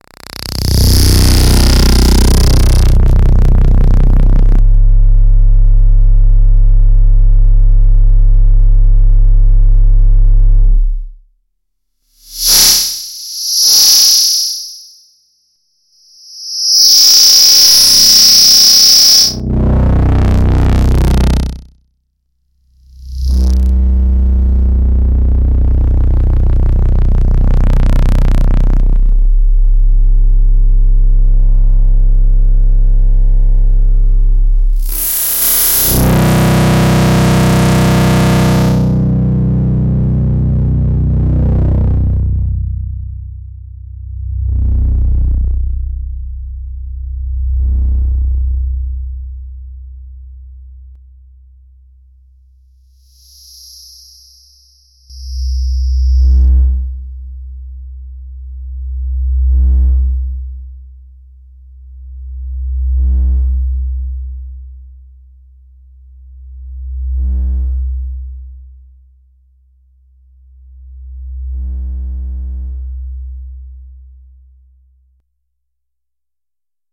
bass-heavy feedback of some sort
bass, dark, distorted, distortion, experimental, feedback, heavy, noise, sfx